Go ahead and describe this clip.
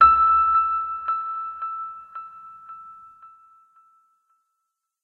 Single high note, part of Piano moods pack.
piano, reverb, delay